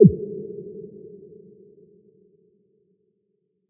Dark Sound 2
dark, soundeffect